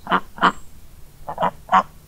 Duck Hen Soft Honk
A cayuga hen softly honking
honk, hen, duck, quack